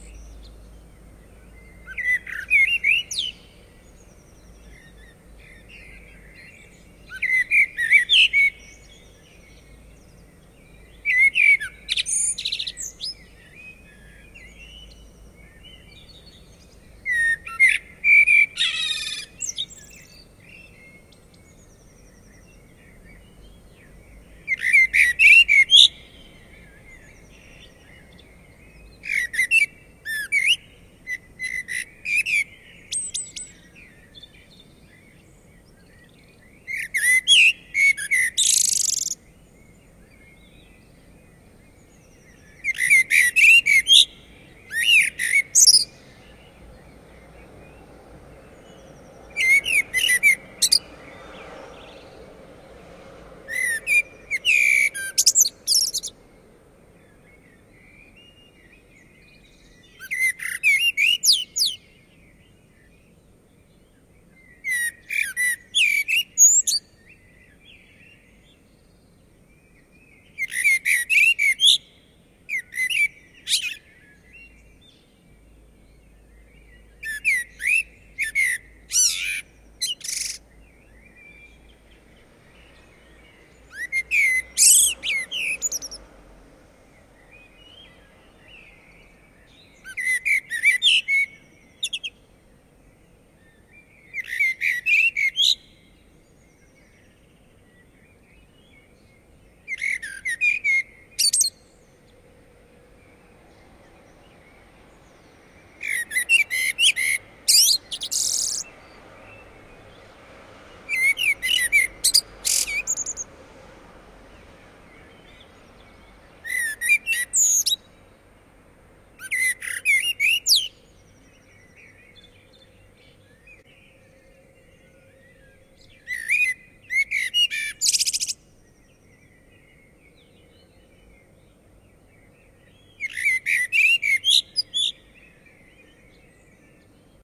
easter morning blackbird 07 04 09
Blackbird singing on the top of our roof early in the Easter morning at 5.40 am. in Cologne, Germany, other blackbirds building the background choir. With a bit of typical traffic grumble like in every big town. Vivanco EM35 with preamp into Marantz PMD671.
mirlo, nature, mustarastas, ambient, morning, town, birdsong, koltrast, turdus-merula, field-recording, merel, solsort, dawn, merle-noir, bird, svarttrost, mirlo-comun, amsel